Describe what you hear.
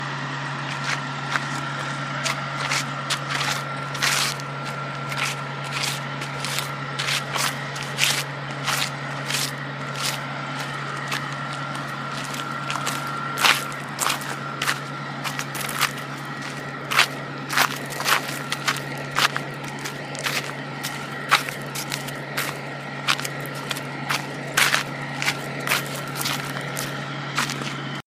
The sound of me dragging my sandals through some gravel.
Recorded in Winter Park, Colorado, United States of America, on Wednesday, July 17, 2013 by Austin Jackson on an iPod 5th generation using "Voice Memos."
For an isolated sample of the bus in the background, go to:
footsteps, gravel